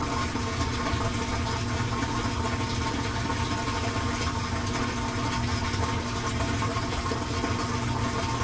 During the rinse cycle.